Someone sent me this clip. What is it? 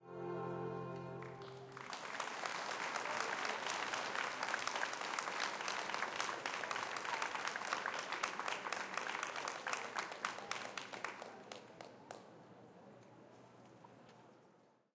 090812 - Rijeka - Pavlinski Trg - Quartet Veljak 6
Applause during concert of Quartet Veljak in Pavlinski Trg, Rijeka.
ambience, aplause, applaud, applause, audience, hand-clapping